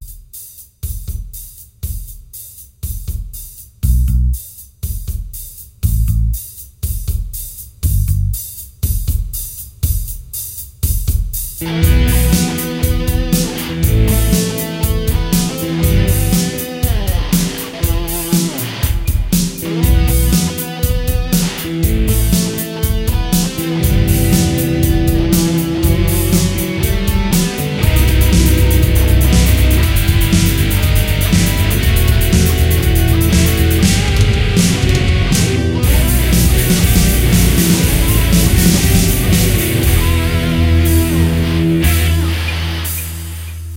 Test guitar solo. Bright noise as artifact of notebook mic input AGC (Automatic gain control) Made in the imposition of a previously recorded.
Recorded: 20-10-2013
Notebook: Aspire-5742G, Windows 7, asio4all driver, Sonar X2, noise gate and VOX plug-ins.
Guitar: Squier Stratocaster, South Korea, early 1990, with pickup Saymour Duncan at bridge position. Very very old strings. Guitar connected directly to notebook mic input.